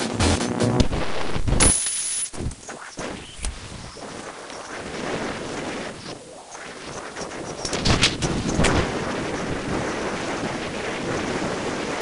sample exwe 0079 tr
generated by char-rnn (original karpathy), random samples during all training phases for datasets drinksonus, exwe, arglaaa
recurrent, char-rnn, neural, network, generative